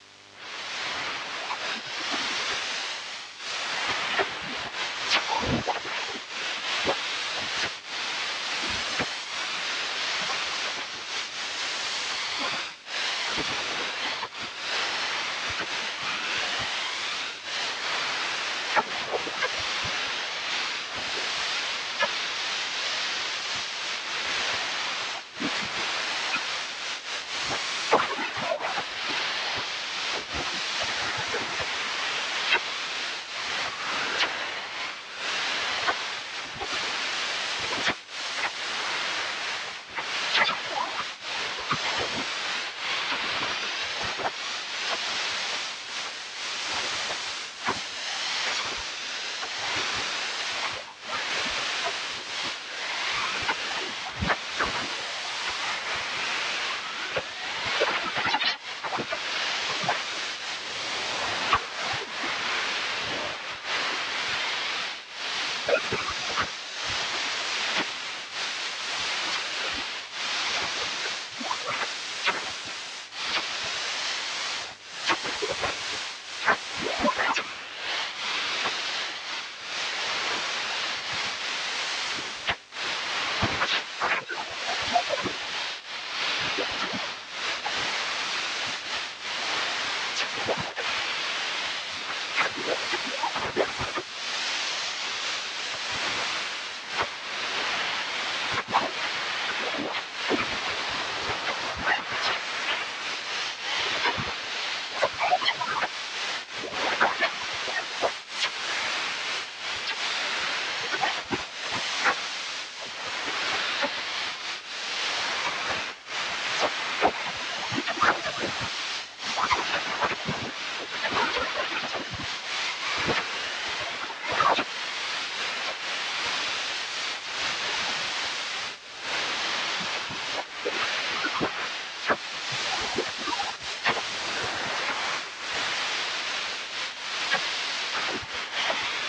Self-made AKR (Auroral Kilometric Radiation) sound
This sound was made in SunVox 2.0e. Using FFT and self-made chorus effect.
ambience, atmosphere, Auroral, electronic, Kilometric, noise, old-time-radio, Radiation, radio, shortwave, voice